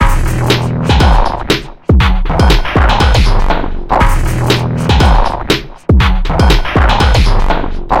Massive Loop -39
An experimental loop with a melodic bass touch created with Massive within Reaktor from Native Instruments. Mastered with several plugins within Wavelab.
drumloop, minimal, loop, experimental, 120bpm